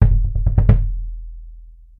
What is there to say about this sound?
played with mallets, recorded with an AT2020 and edited in Ableton.